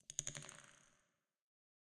ping pong ball hall
ball, pong, ping, reverb